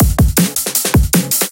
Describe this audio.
Drum D'n'B FBB Line 01
D, B, Heavy, Acoustic, Drums, n